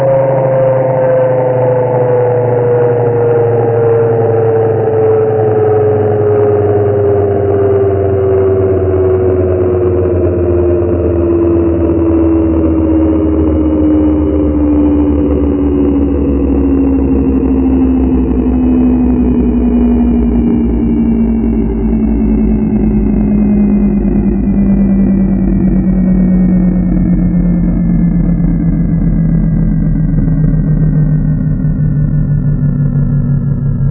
Another sound made from a snippet of a human voice mangled in Cool Edit 96 to simulate a giant generator or engine winding down.
ambient, engine, generator, soundscape